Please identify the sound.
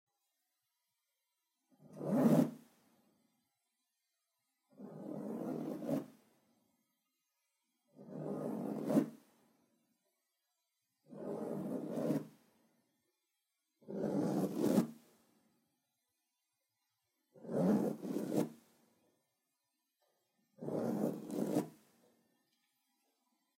This sound might be suitable when zipping clothes like pants, jackets or similar.
agaxly, zip, clothing, unzip, pants